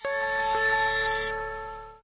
galaxy s7 and s7 edge shutdown don't know
This is my version of the Samsung Galaxy S7 shutdown sound. I don't know if S7 has a shutdown sound either, so that's why I decided to upload this too.
sound, shutdown, galaxy, Samsung, s-series